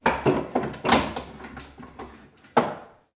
open toolkit case
Opening a toolkit box. A bit of natural room reverberation is present.
Recorded with Oktava-102 microphone and Behringer UB1202 mixer.
rattle, metal, case, craft, box, toolkit